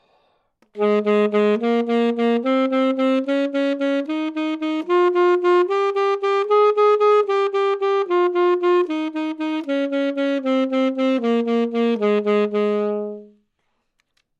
Sax Alto - G# Major
Part of the Good-sounds dataset of monophonic instrumental sounds.
instrument::sax_alto
note::G#
good-sounds-id::6612
mode::major
scale, neumann-U87, sax, good-sounds, GsharpMajor, alto